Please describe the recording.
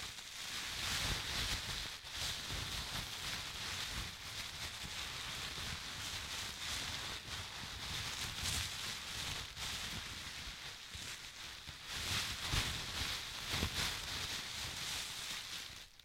Fire Four
Fire sounds including sounds of roaring flame and crackling. Recorded on a Rode mic and Zoom H4N Pro.